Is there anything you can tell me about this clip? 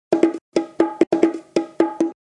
JV bongo loops for ya 1!
Recorded with various dynamic mic (mostly 421 and sm58 with no head basket)
bongo, congatronics, loops, samples, tribal, Unorthodox